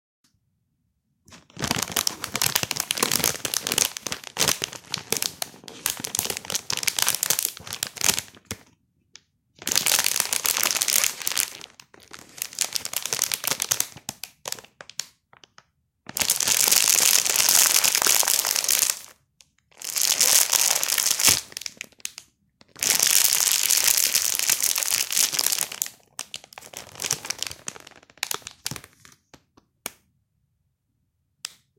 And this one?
Packet handling
Handling a packet of sweets